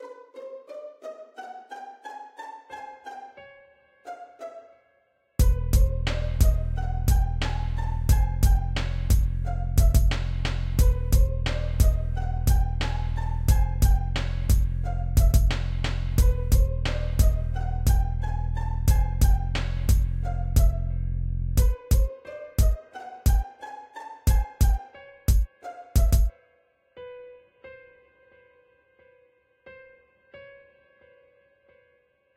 Hip-Hop loop #17
Rap beat loop in which I used piano samples. Created in LMMS.
kick, Hip-Hop, rap, drum, piano, loop, beat, bass, music